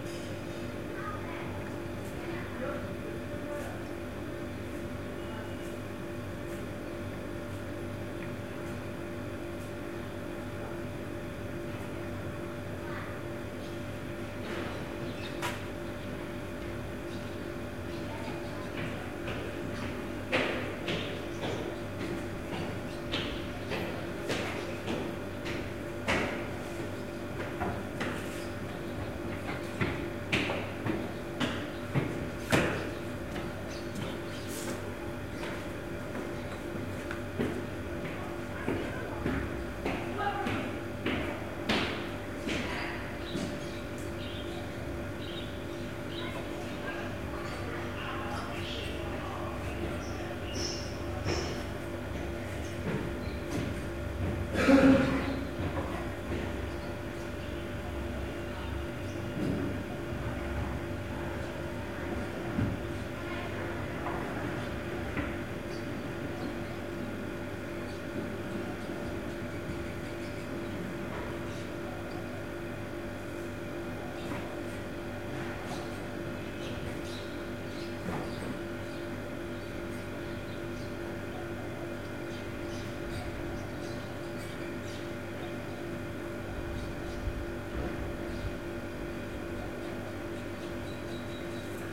Soundscape from around my apartment during the scorching-hot summer months in Nantong, Jiangsu, China.